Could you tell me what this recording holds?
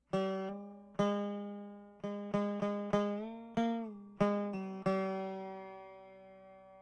Sarod w/no processing. intro riff.